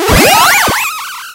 SFX Powerup 29
8-bit retro chipsound chip 8bit chiptune powerup video-game